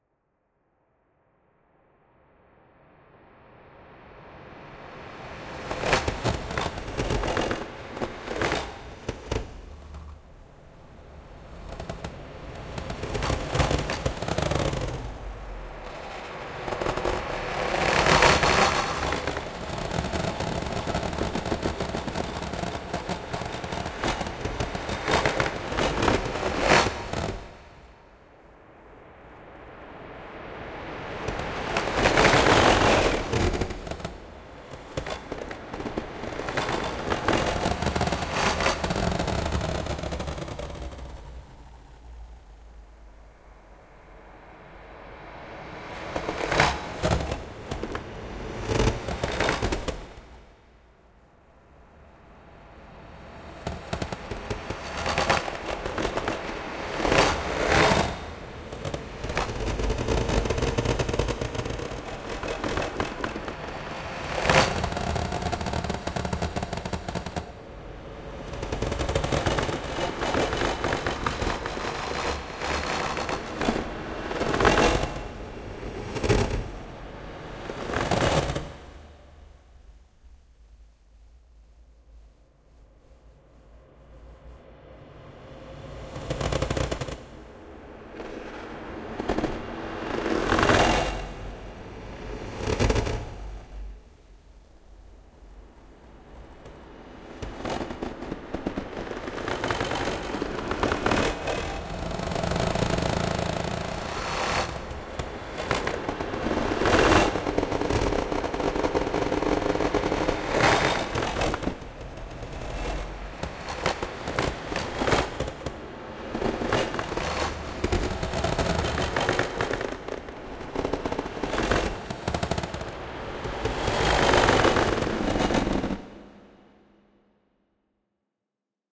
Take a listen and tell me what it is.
My coffe-machine (a Senseo) went bezerk last Tuesday. I added some madness to the recording using granular synthesis and revers. The result will end up in one of my compositions, it is the power-of-fracture. To be honest, I like this kind of madness...